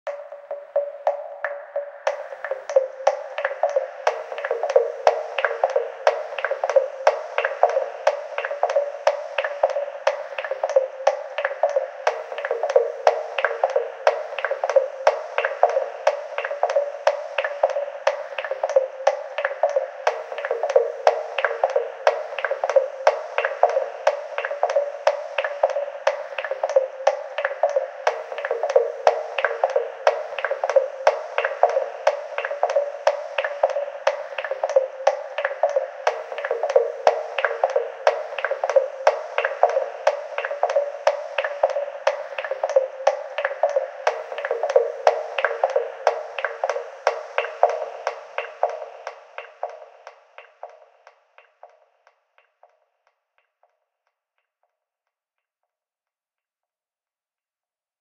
musical drips stylised
This is a stylised musical dripping sound effect, that can be easily loopd..... it was created by me for a stage play
drip, drop, musical, notes, tap, water